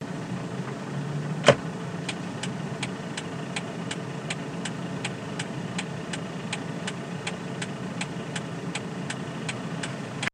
Car indicator
Indicator, traffic, car